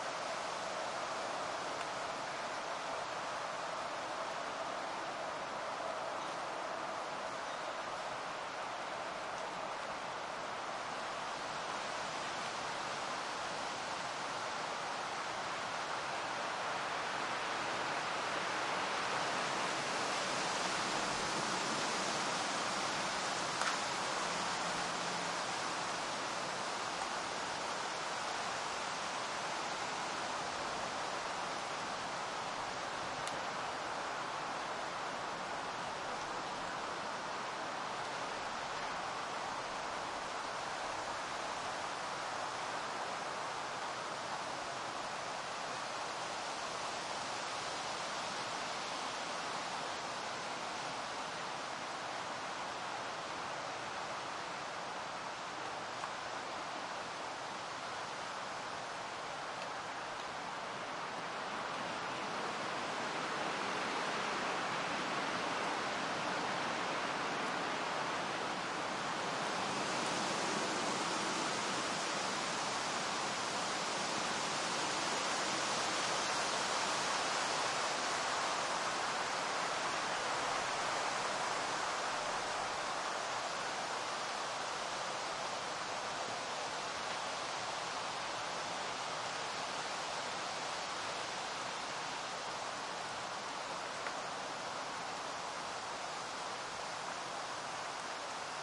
wind through trees heavy white noisy +distant highway
distant, heavy, highway, through, trees, wind